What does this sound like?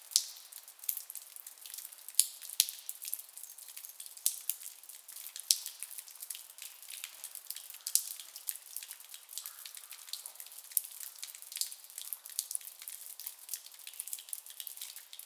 Blood Dripping Loop 00
A slimey and dry blood dripping loop sound to be used in horror games. Useful for evil areas where sinister rituals and sacrifices are being made.